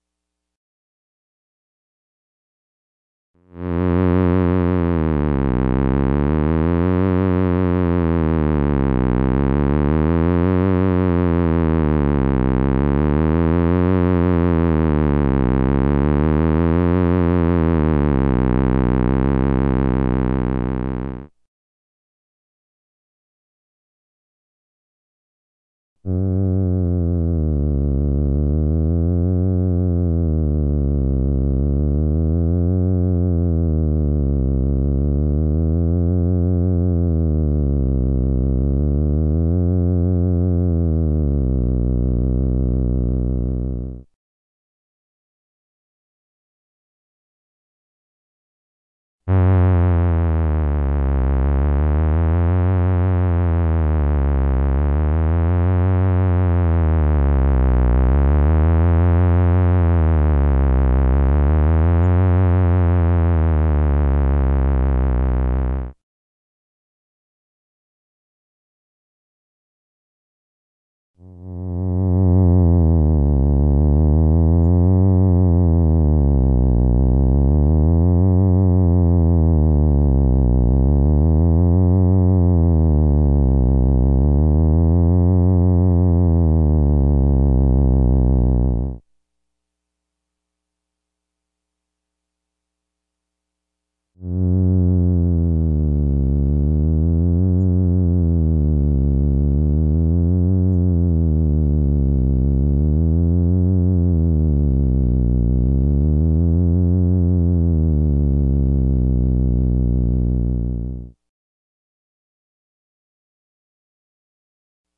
HypnoTones Low A
File contains a collection of 4 or 5 creepy, clichéd "hypno-tones" in the theremin's lowest ranges, each separated with 5 seconds of silence. Each hypnotone in the file uses a different waveform/tonal setting to give you various textural choices.
As always, these sounds are recorded "dry" so that you can tweak and tweeze, add effects, overdub and mangle them any way you like.
creepy, genuine-theremin, sci-fi-sound